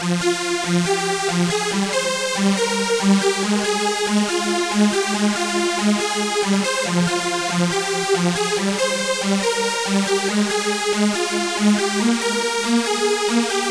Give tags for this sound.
140
150
arp
bass
beat
bmp
hard
hardtrance
sequence
techno
trance

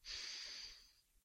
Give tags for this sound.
smell
sick
sniffing
cold
sneeze
flu
sniff
nose

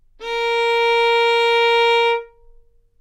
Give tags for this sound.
good-sounds
neumann-U87
multisample
violin
single-note
Asharp4

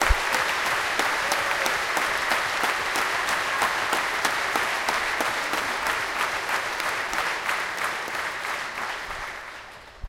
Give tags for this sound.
applause
audience
cheer
cheers
clap
crowd
foley
loud
people
performance
polite
rythm
show
theater

